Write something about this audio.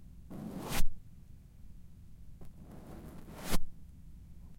Paper cutter-stereo
This is the sound of paper being cut on a paper cutter (like at Kinko's). I created this with a zoom H5n and the wind guard from a shotgun mic. I brushed the wind guard against the built-in x-y zoom mics, which created the stereo effect.
office-sounds, kinkos, paper-cutter